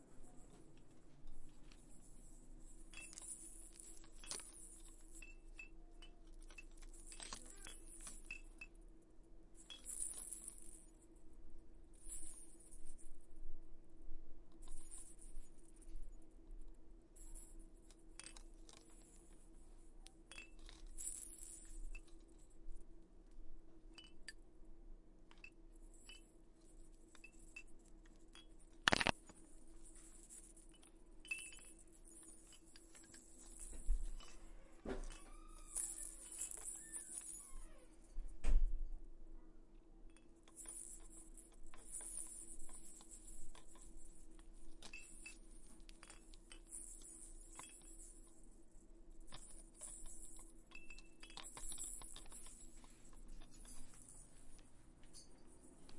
plastic rattles clinking together
one in a series of recordings taken at a toy store in palo alto.